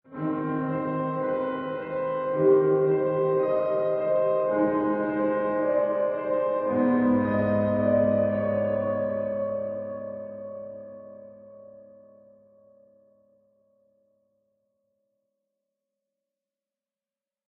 ghost piano 2
This is the original bridge, more emphatic and with a plaintive moving line.
piano, reverb, creepy, spooky, ghost, haunted